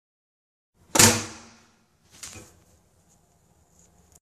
Letterbox clang
The abrupt metal slam of a spring-loaded mailbox.
slam, metal, letterbox